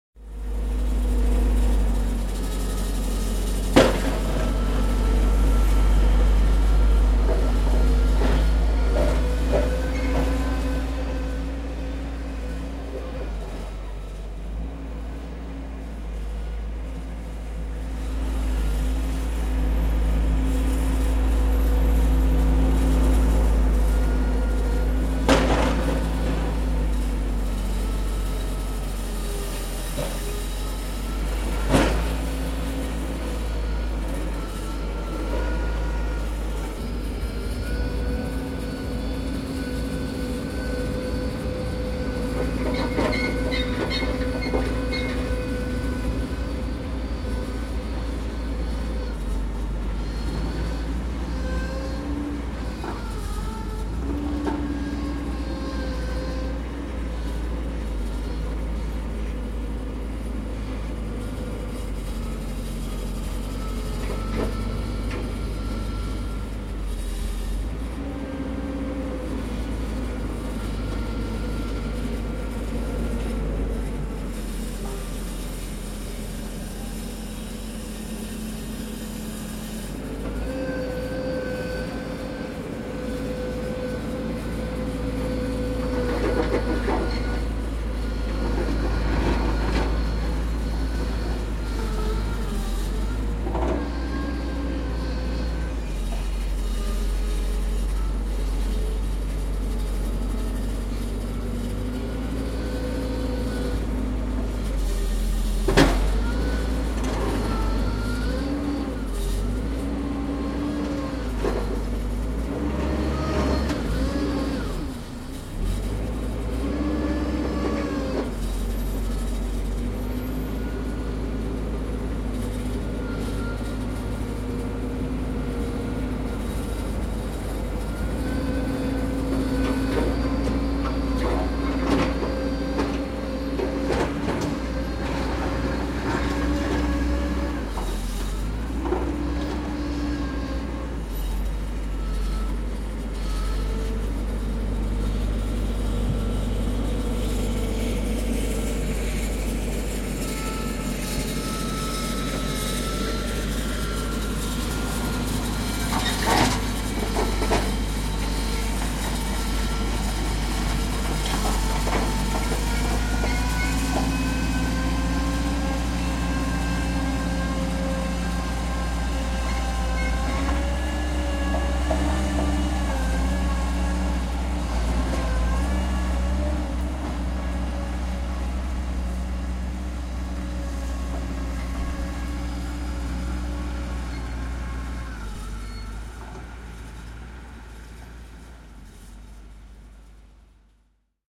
Lumen auraus, traktori, lumitraktori / A small tractor, snowplough, ploughing snow in the street and a yard, shovel rattling, recorded from the third floor
Pientraktorilla aurataan lunta lähiön kadulta ja kerrostalon pihalta. Kauhan kolinaa ja vinkumista välillä. Äänitetty 3. kerroksen ikkunasta.
Paikka/Place: Suomi / Finland / Helsinki, Pikku-Huopalahti
Aika/Date: 04.02.2004
Aura, Aurata, Clear-the-snow, Field-Recording, Finland, Finnish-Broadcasting-Company, Hanki, Kolista, Lumi, Pientraktori, Plough, Snow, Soundfx, Suomi, Talvi, Tehosteet, Winter, Yle, Yleisradio